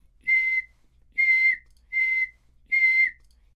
men with a whistle